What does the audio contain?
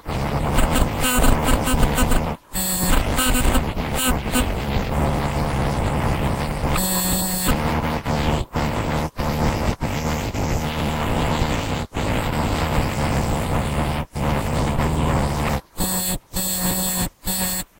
Whenever I use my USB stick, my computer always makes an awful noise. I decided to record it and see if any of you guys can make anything out of it.
To me it sounds like some sort of aliens or robots talking to each other.
The only editing done was noise removal to get rid of the fuzziness and amplification to make it a little bit louder.